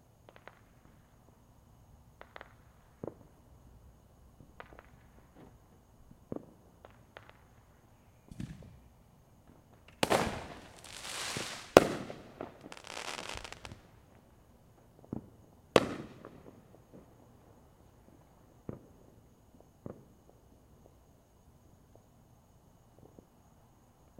Fireworks recorded with laptop and USB microphone after the city's firework's display around my neighborhood. There were less fireworks at the stadium?
4th, field-recording, holiday, independence, july